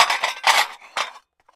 Plate Rubbing Pulse
Recorded by myself and students at California State University, Chico for an electro-acoustic composition project of mine. Apogee Duet + Sennheiser K6 (shotgun capsule).
break, ceramic, china, cup, Dish, percussion, porcelain, smash